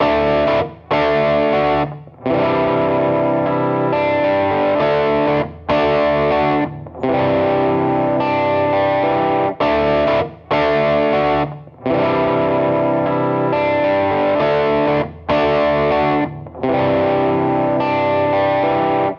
D C dist 100 bpm
D and C arpegios on clean electric guitar